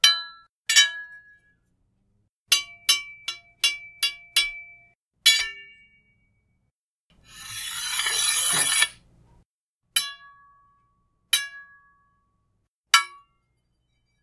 Hollow Metal Pipe Hits
Hit two 1/2" Metal EMT pipes together. Left spaces between sounds of interest.
Might be useful for a train crossing signal, a sword fight, or a blacksmith hammer on anvil.
Recorded on a Blue Yeti Microphone through Audacity at 16bit 44000Hz.